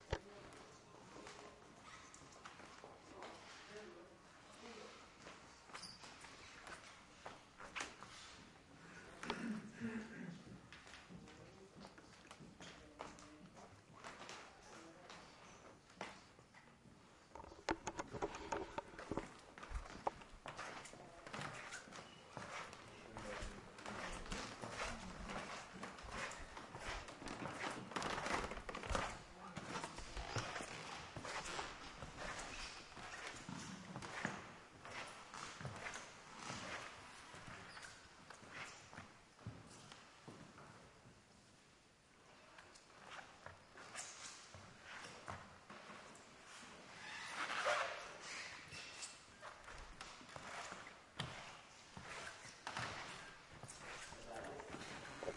passi su vecchio parquet attenzione al centro
sounds, nature, foley, efx, sound